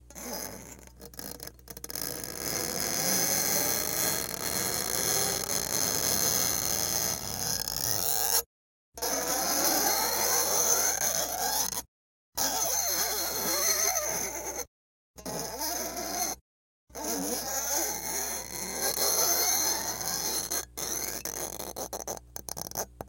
Razor Blade On Acoustic Guitar - 2 of 8
[[This sound pack contains 8 sounds total, and this is # 2 of 8.]]
Use it, sell it, do anything and everything with it. I only hope it's useful for someone! However, I'd love to hear about any projects you use my sounds with!!
Abstract, creepy sounds I recorded after watching this video on Hans Zimmer's creation of the score to the original Dark Knight soundtrack:
(Fascinating video, give it a watch! :D)
- I found an old rusty razorblade (if it ain't rusty it ain't emo, amiright? amiright? Oh god that's in poor taste)
- and just started scraping it over the copper-wound strings of my Seagull S6 acoustic guitar in which I've installed an electronic pickup.
- Recorded into Reaper on my mid-2014 Macbook Pro, via direct in through a Zoom H4N in audio-interface mode.
Only processing: gentle EQ highpass at 95 hz. And some slight gain reduction.
These sounds remind me somehow of creepy film scores where the strings do that erratic pizzicato thing that sends tingles up your spine.